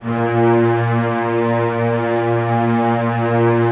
05-synSTRINGS90s-¬SW
synth string ensemble multisample in 4ths made on reason (2.5)